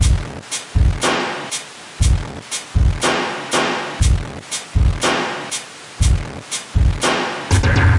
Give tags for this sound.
noisy; sound-to-image; paintshop-pro; processing

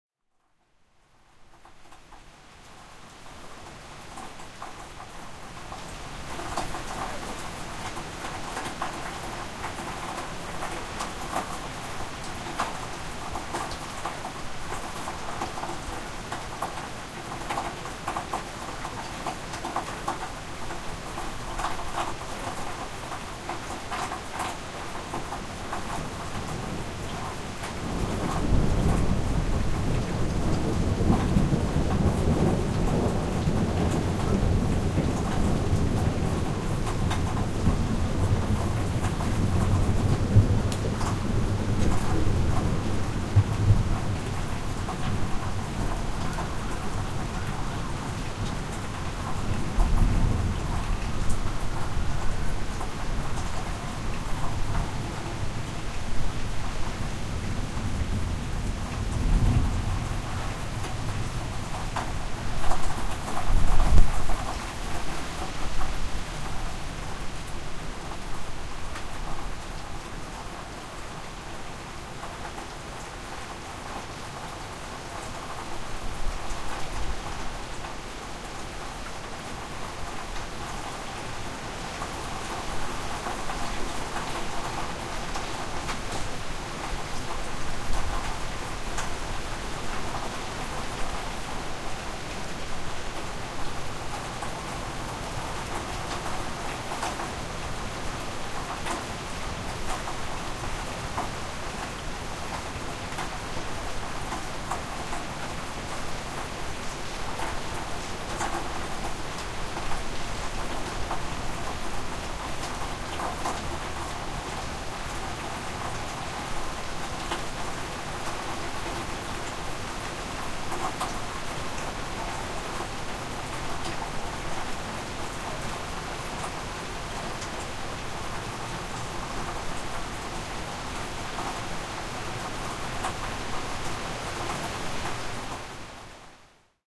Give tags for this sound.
rain; thunder; drainpipe

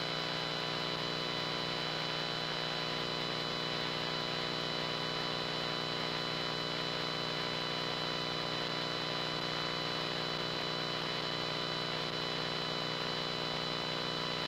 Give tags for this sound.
electric-current; electricity; hum; noise; telephone-pickup-coil

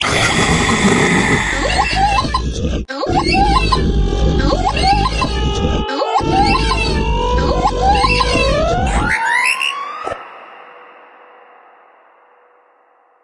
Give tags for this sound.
noise; transformer; abstract; scary; woosh; horror; game; dark; drone; rise; futuristic; Sci-fi; cinematic; destruction; atmosphere; stinger; metalic; impact; metal; voice; moves; hit; opening; morph; transition; glitch; transformation; background

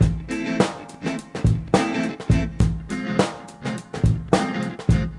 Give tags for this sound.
ragga; rasta; reggae

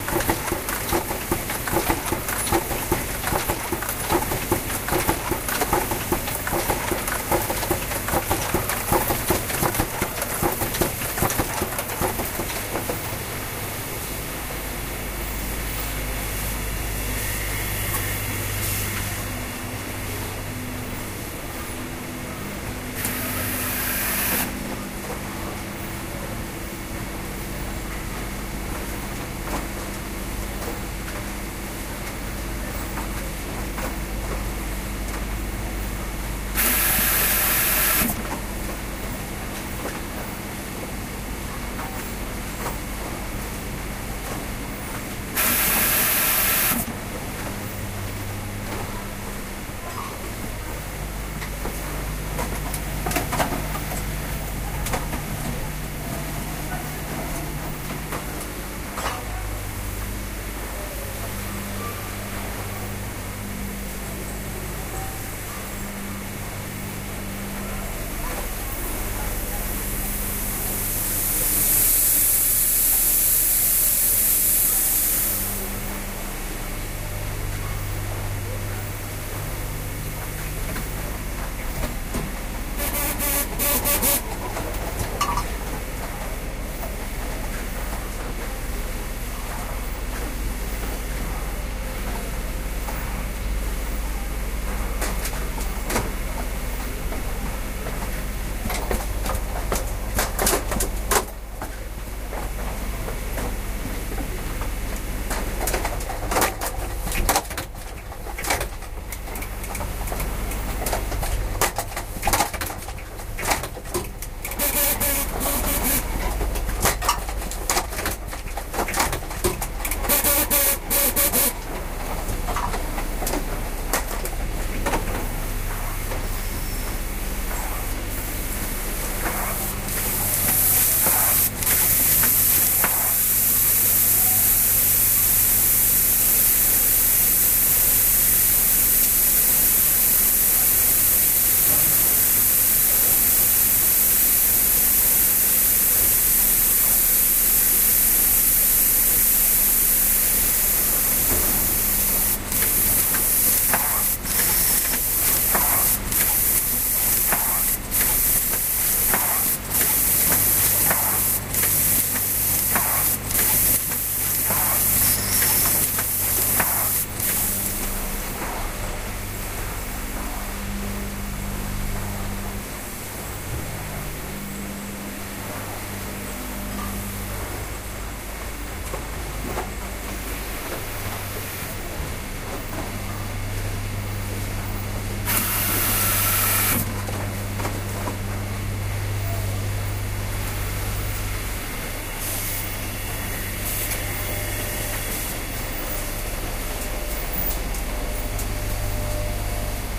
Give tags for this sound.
assembling; industrial; machines; noise

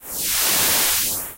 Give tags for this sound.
acid; Audacity; caution; hydrochloric; hygiene; maintenance; synthetic

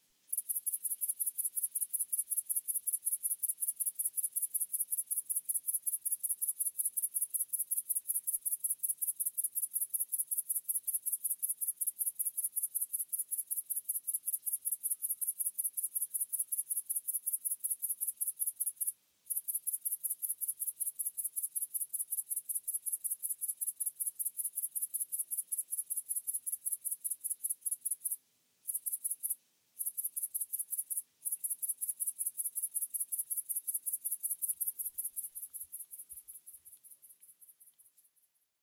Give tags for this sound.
background; cricket; ambient; zoom; insects; summer; sennheiser; grasshopper; crickets; grasshoppers; atmosphere; h4n; nature; soundscape; insect; field-recording; night; atmo; birds; background-sound; bugs

